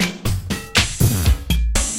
All loops in this package 120 BPM DRUMLOOPS are 120 BPM 4/4 and 1 measure long. They were created using Kontakt 4 within Cubase 5 and the drumsamples for the 1000 drums package, supplied on a CDROM with an issue of Computer Music Magazine. Loop 7 has a fart in it...
120BPM, rhythmic